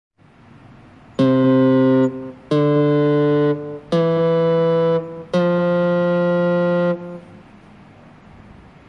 Preset do Volca Keys 2
Preset do Volca Keys. Gravado com app audio recorder para smartphone Android.